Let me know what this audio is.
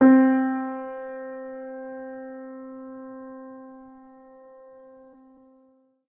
MISStereoPiano
These were made available by the source listed below.
You may also cite as a reference, link to our page from another web page, or provide a link in a publication using the following URL:
Instrument Piano
Model Steinway & Sons
Performer Evan Mazunik
Date November 5 & 27, 2001
Location 2017 Voxman Music Building
Technician Michael Cash
Distance Left mic 8" above center bass strings
Right mic 8" above center treble strings